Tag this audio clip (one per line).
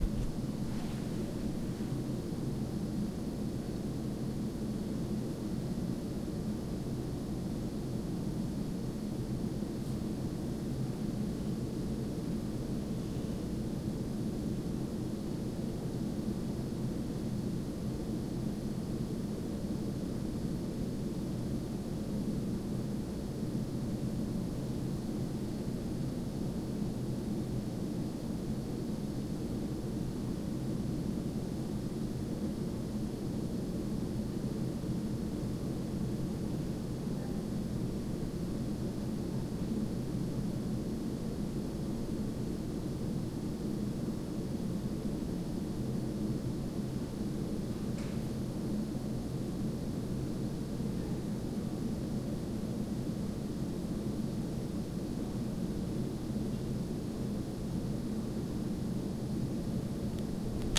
air
air-conditioning
building
conditioner
conditioning
school-building
ventilator